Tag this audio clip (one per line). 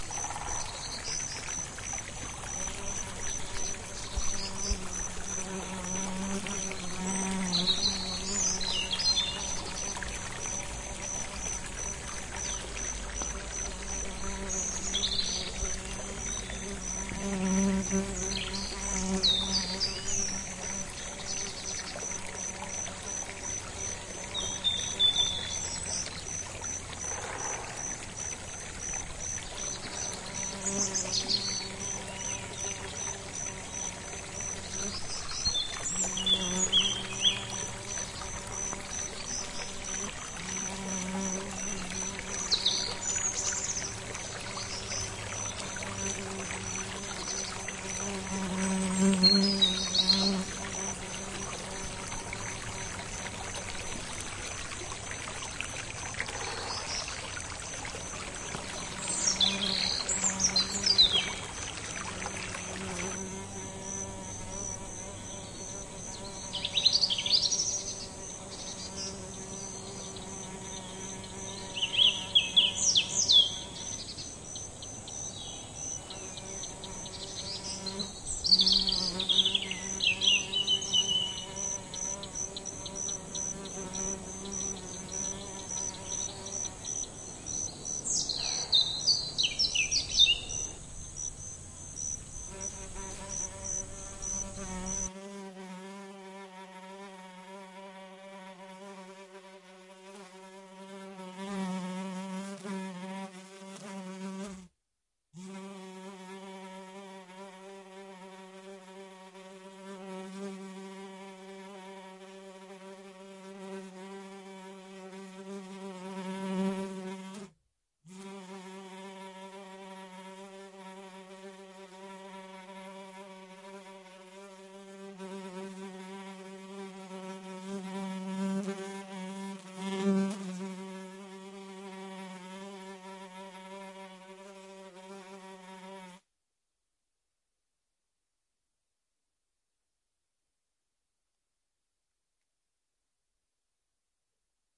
birds
chillout
evening
fly
forest
noon
walk
woodpecker